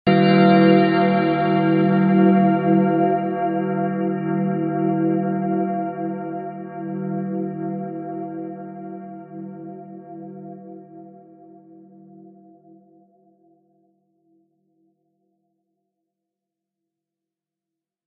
Grand Bell
Grosse Synthetische Glocke
Syn, Grand, Bell